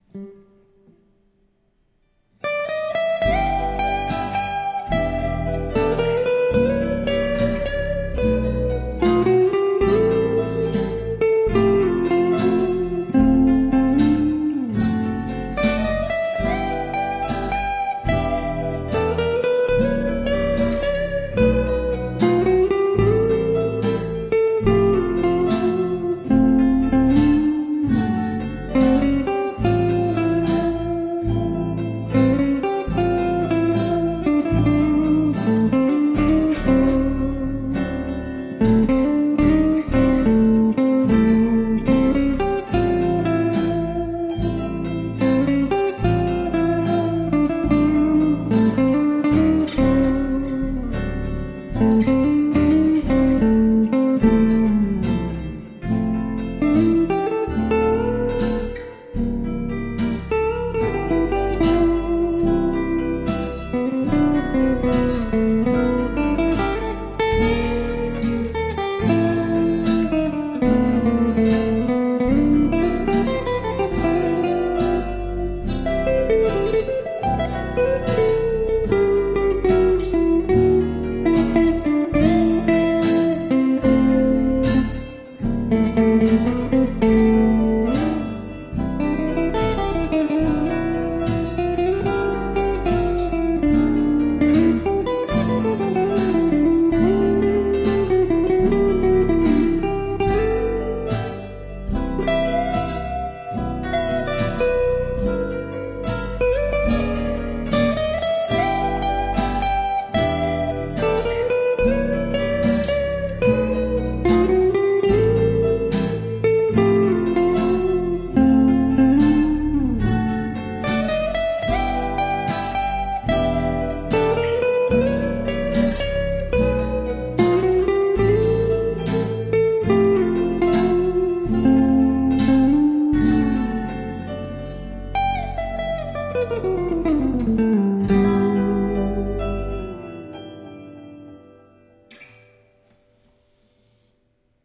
It`s slow, melodical and gently instrumental composition. Instruments, that`s i used in record: semi-hollow electric guitar "Musima record 17", acoustic archtop guitar "Lignatione", Midi piano keys and bass.
Here i`ve tried to convey a sentimental autumn mood with help of my guitars and keys.